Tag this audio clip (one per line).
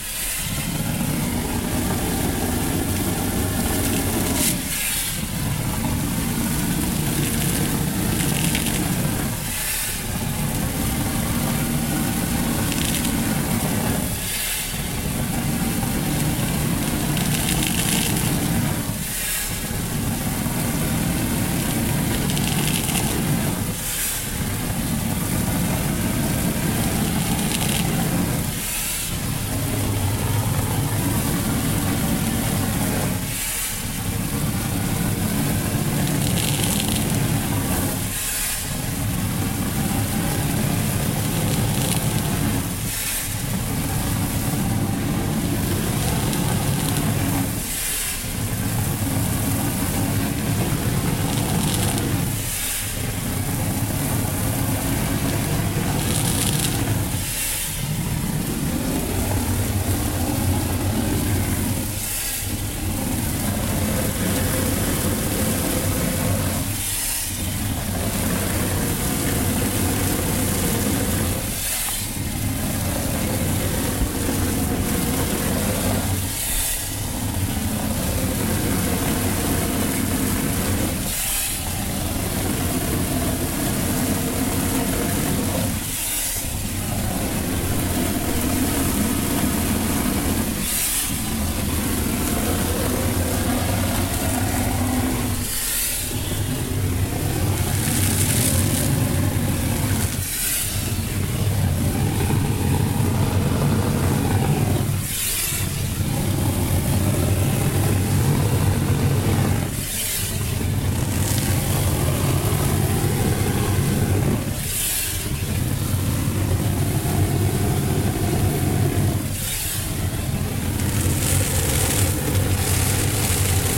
concrete
spray